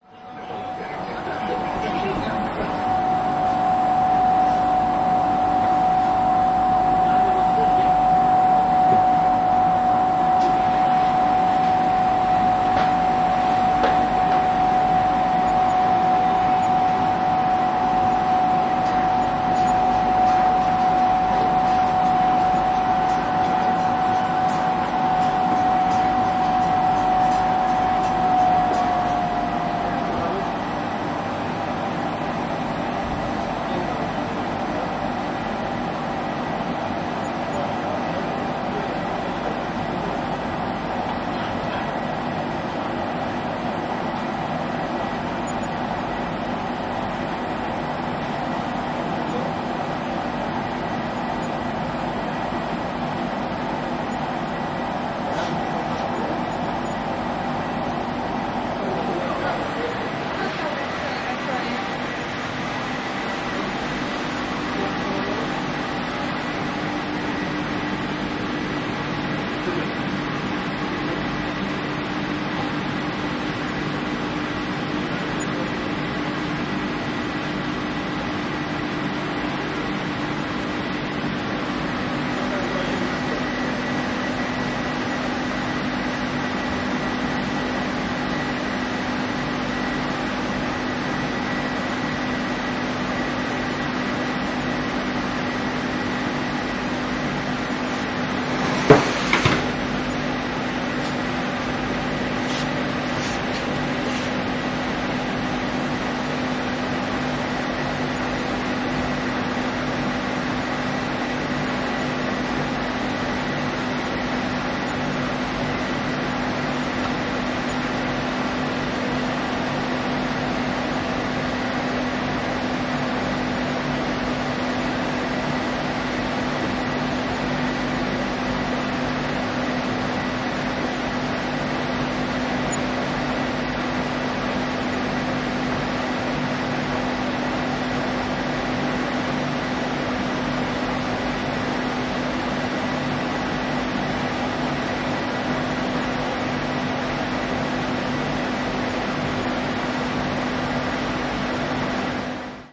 laundry noise os.sobieskiego 06.04.2016
06.04.2016: soundwalk with my student (exercise during Ethnological Workshop: Anthropology of Sound). The Os. Sobieskiego in Poznań. Sounds of the local laundry. Recordist: Zuzanna Pińczewska.
machine, noise, Os, Sobieskiego, soundwalk, fieldrecording, Pozna, laundry